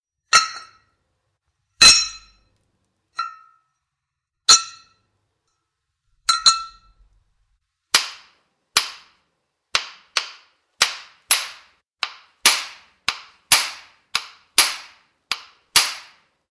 Various metal clanks and tinkles.
Recorded with Oktava-102 microphone and Behringer UB1202 mixer desk.
clink, tinkle, clank, metal, craft, foley